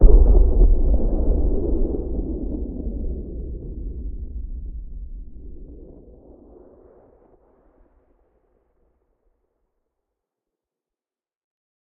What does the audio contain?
distant explosion
an explosion sound, made using NI massive with additional processing
impact, foley, sub, bass, explosion